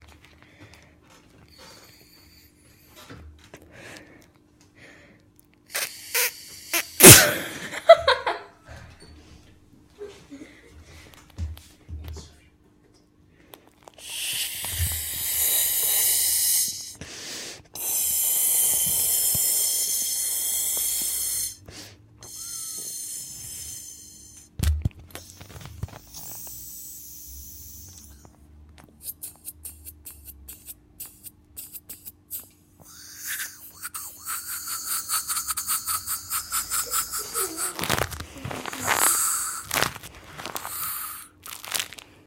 07 Blowing a Ball
This is a recording of a girl blowing up a rubber ball. It was recorded at home using a Studio Projects C1.